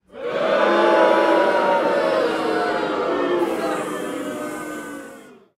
A large group of people booing.